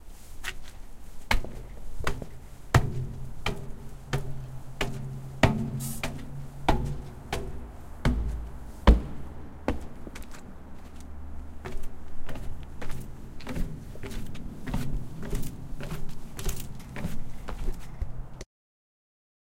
Recorded at an abandoned factory space in Dublin Ireland. With Zoom H6, and Rode NT4.